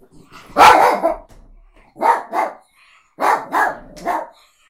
Barking Dog 1
Jack Russell Dog trying to bite something.
animal, fighting, attack, woofing, lurching, bite, woof, fight, dog, Jack-russell, attacking, barking, biting